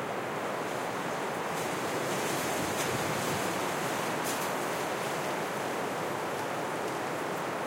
a wind gust hits a tree's branches. Or rather I should say leaves, as the tree was a palm. Sennheiser MKH60 + MKH30 (with Rycote windjammer)into Shure FP24 preamp, Edirol R09 recorder